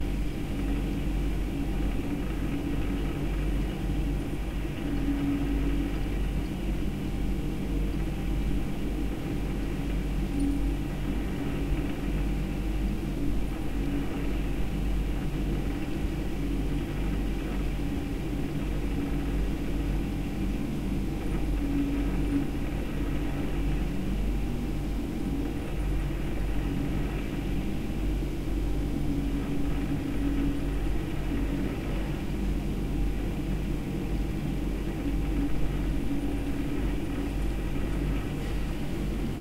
office.refrigerator
Refrigerator in the office kitchen. Recorded near refrigerator back wall and the wall.
Recorded: 2012-10-19.
kitchen, noise, office, refrigerator